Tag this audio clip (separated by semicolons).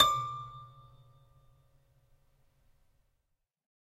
collection; michelsonne; piano; toy